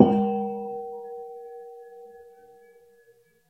These are sounds made by hitting gas bottles (Helium, Nitrous Oxide, Oxygen etc) in a Hospital in Kent, England.
bottle, gas, gong, hospital, metal, percussion